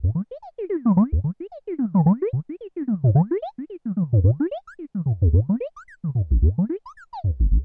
Wobble Loop II
More messing around with minibrute synth arpeggiator mode.
analog, loop, minibrute, synth, wobble